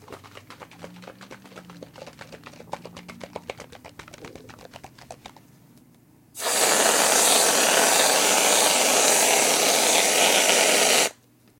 This is a recording of a can of whipped cream being sprayed on a plate.
Recorded with a Neumann KMR 81i shotgun mic.
Enjoy!